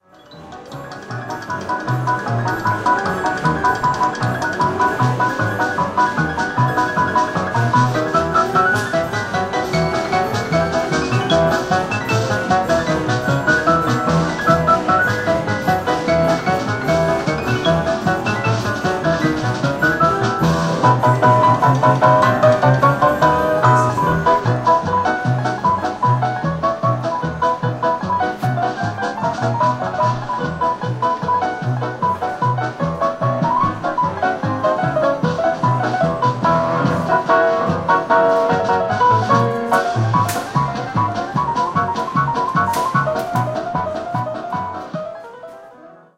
the sound of a piano toy from the Mechanical Museum at Fisherman's Wharf, San Francisco. recorded by a SONY Linear PCM recorded placed at the side of that wooden jukebox-like structure.